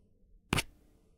Pen on Paper 07
Pen on paper.
{"fr":"Raturer 07","desc":"Raturer au stylo à bille.","tags":"crayon stylo rature"}